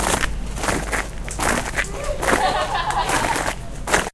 SonicSnaps HD Heidi&Hetty Leaves
This is a sonic snap of leaves crunching recorded by Heidi and Hetty at Humphry Davy School Penzance
cityrings, heidi, hetty, humphry-davy, leaves, snap, sonic, UK